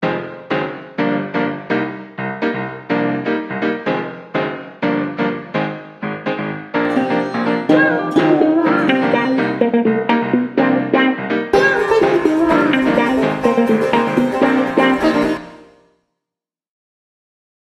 Happy Tune
tv, advertising, commercial